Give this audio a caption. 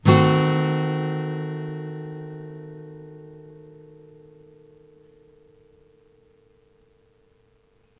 yamah Cdim
Yamaha acoustic guitar strummed with metal pick into B1.